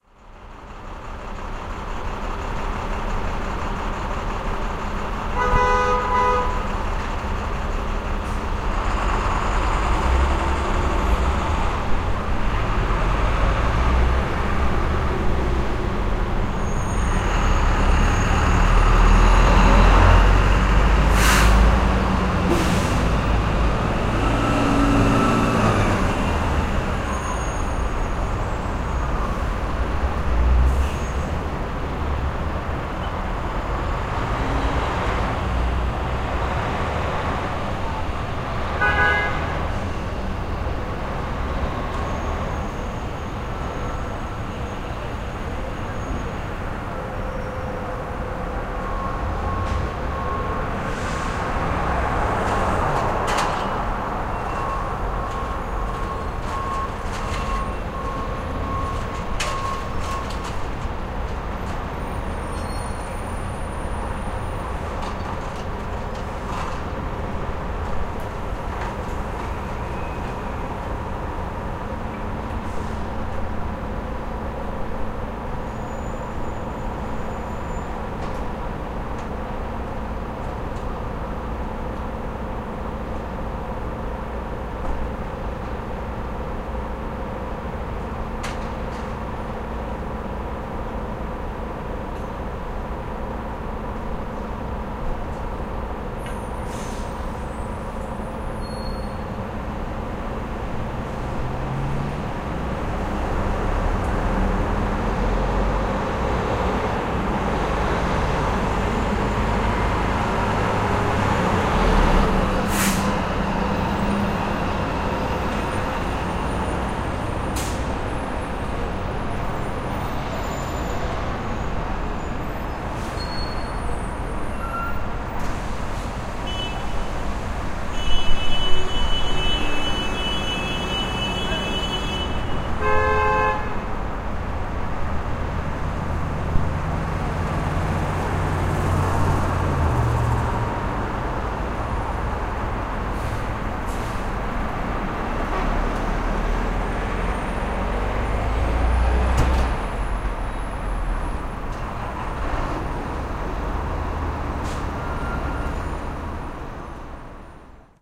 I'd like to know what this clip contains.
Bway Wash Hts NYC 9am 103112
Morning rush hour on Broadway in Manhattan, just south of the George Washington Bridge, 10/31/12, just after reopening bridges in and out of the city, in the wake of Hurricane Sandy; busier and louder than usual. Perspective from 4th floor window, stereo mic, with traffic travelling north and south on Broadway.
General traffic sounds, stop and go at intersection, idling at light, squealing brakes, horns, reverse alerts, engines, one truck unloading goods onto sidewalk across street.